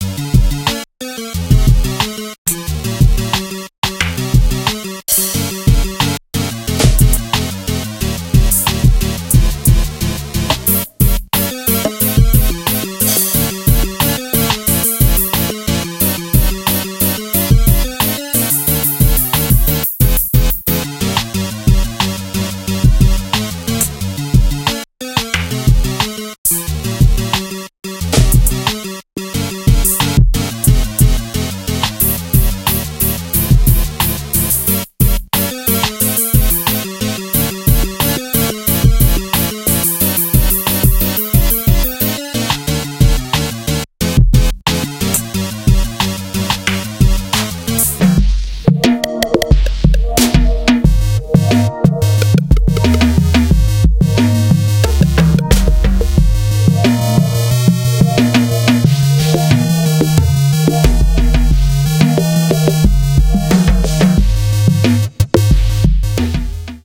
Lost Moon's -=- A Variables Variable
2013, 8bit, blix, chip, cosmic, laboratory-toy-toons, nintendo-sounding